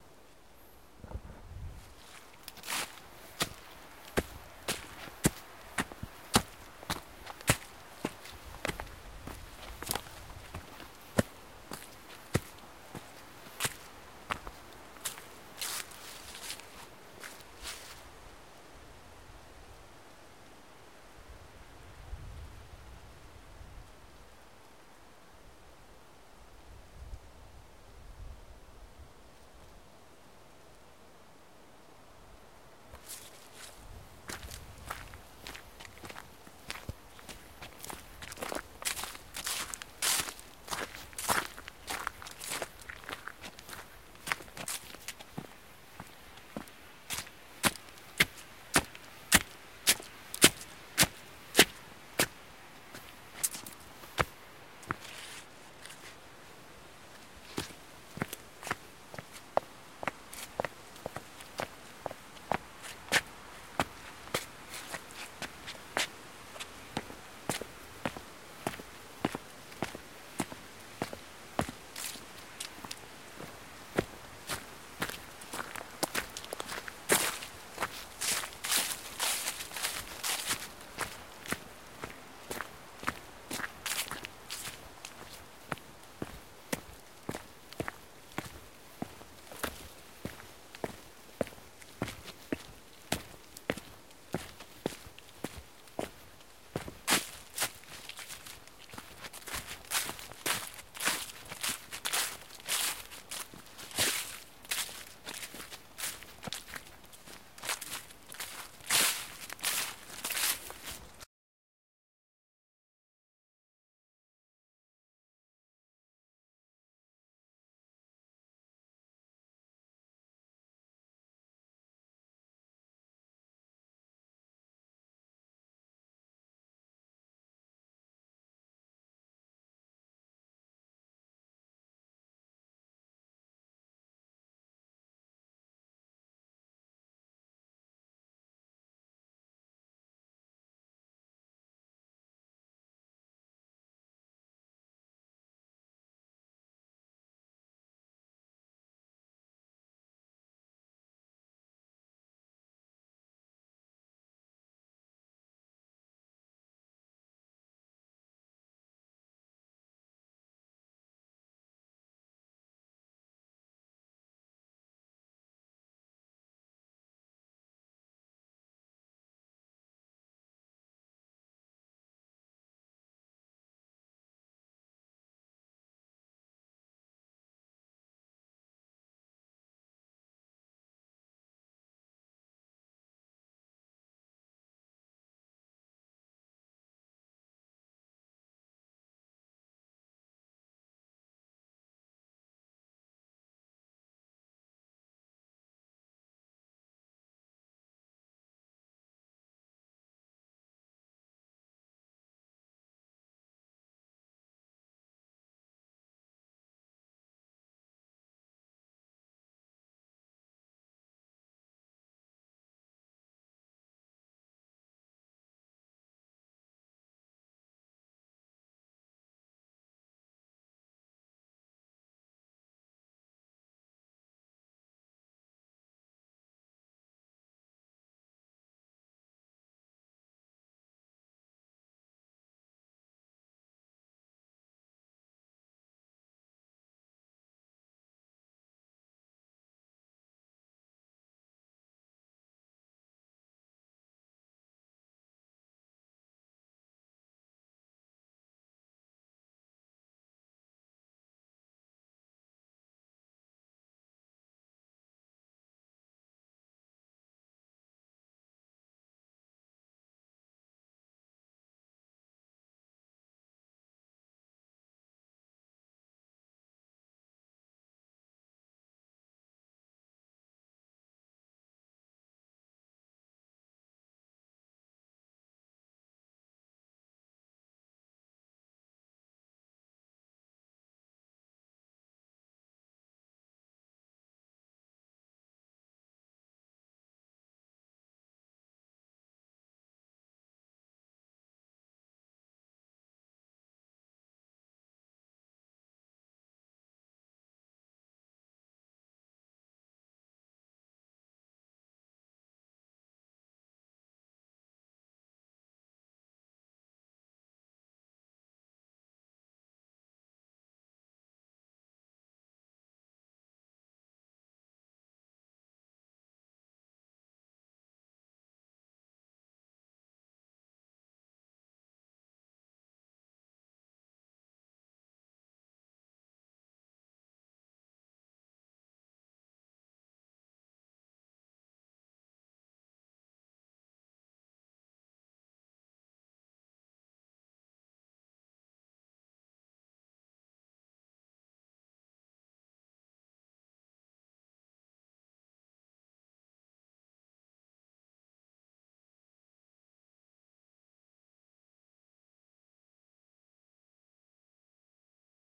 walking ambience forest autumn crunchy step walking leafes-003
walking ambience forest autumn crunchy step walking leafes leaves walk footstep crunch
leaves, forest, crunch, autumn, crunchy, footstep, ambience, walk, walking, step, leafes